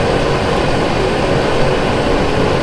#sn cpu m3 loop 02
roaring, noise, white